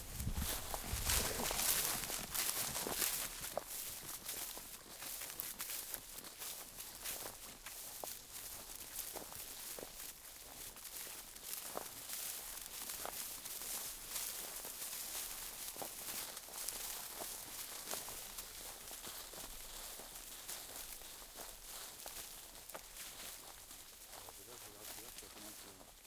country man walk in a field
agriculture, country, farming, field, walk, walking